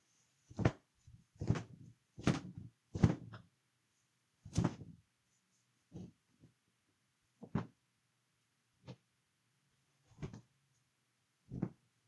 Large Cloth Shaking Off
A large cloth being shaken off.
clear, cloth, dust, fabric, large, movement, moving, off, shake, shaked, shaking, towel